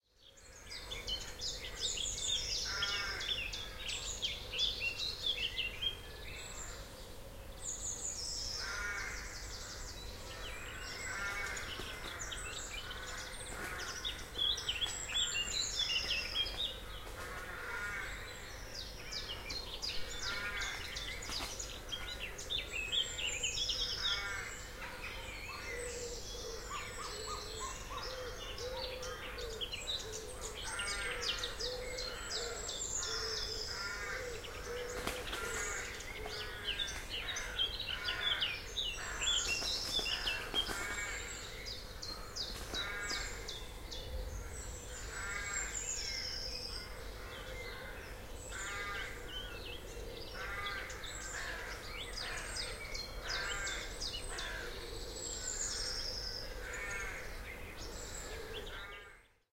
Essex spring woodland
Woodland wildtrack with a mixture of birds. Noisy Crows, Chiffchaff and a bird flapping. Recorded in Pedlars Wood, Frinton-on-Sea, Essex, UK. Recorded with a Zoom H6 MSH-6 stereo mic on a calm spring morning.